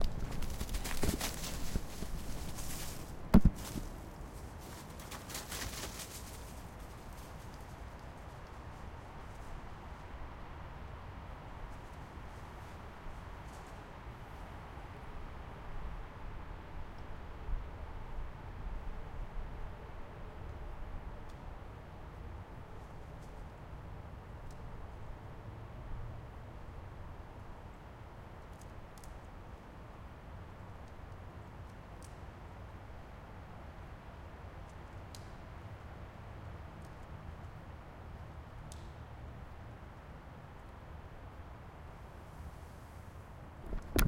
Walking the dog in the forest in The Netherlands